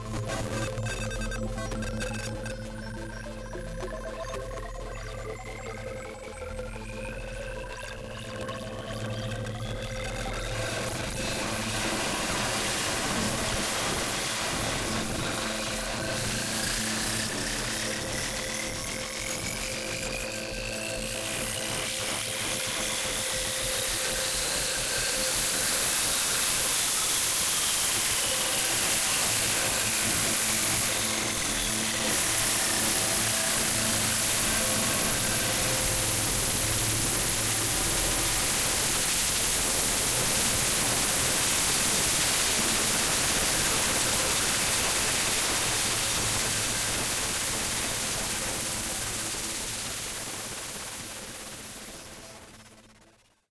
This was a big four oscillator, four filters four enevelope, four amplifiers, eight lfos, two distortion modules, two balance modulating two -> one mixers. In other words a big moduler synth patch. What you hear is just middle c neing played. I could run this thing for minutes without it ever repeating due to lfo modulating lfo, and multiple inputs for control voltages on the filters frequency cutoff.